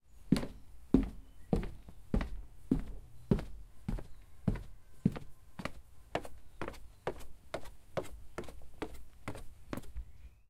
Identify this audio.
footsteps - wood & stairs 01
Walking across a wooden deck and down wooden stairs with the microphone held to my feet.
wooden-stairs, outside, steps, footsteps, wood-stairs, walking, wooden, deck, floor, wood, walk, porch, outdoors, stairs, feet